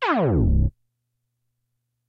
Pieces to create a tape slowdown effect. Recommend combining them with each other and with a record scratch to get the flavor you want. Several varieties exist covering different start and stop pitches, as well as porta time. Porta time is a smooth change in frequency between two notes that sounds like a slide. These all go down in frequency.